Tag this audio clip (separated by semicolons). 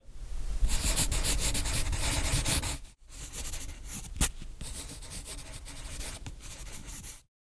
CZ; Czech; Pansk; Panska